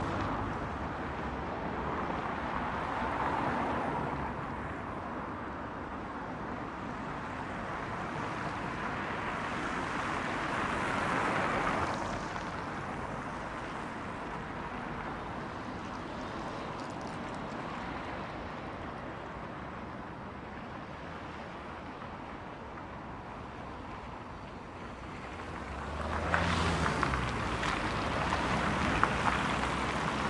City 2 mono
City ambience recorded with a MKH 60 to a Zoom H4N. Helsinki in the spring.
city, ambience, city-ambience